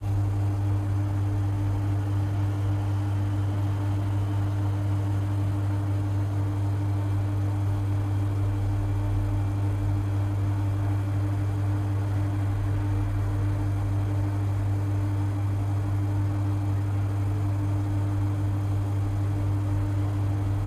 Foley, Street, Ventilation, Hum
Ambience, Background, Foley, Hum, Recording, Street, Vent